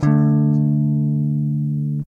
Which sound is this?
Jackson Dominion guitar run through a POD XT Live Mid- Pick-up. Random chord strum. Clean channel/ Bypass Effects.